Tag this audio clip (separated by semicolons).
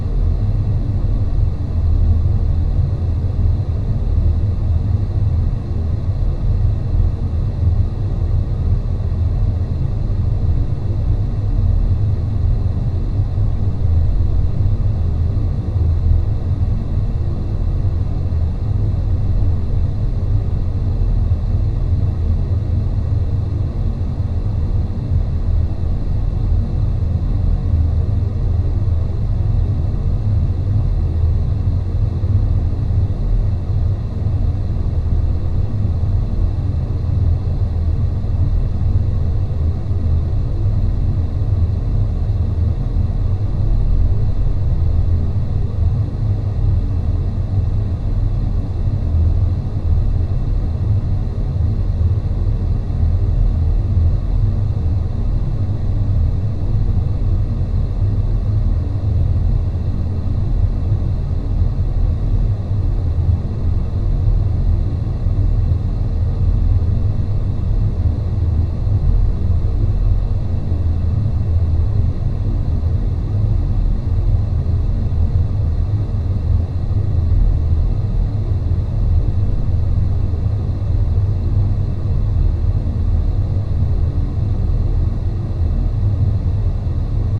atmosphere; engineroom; background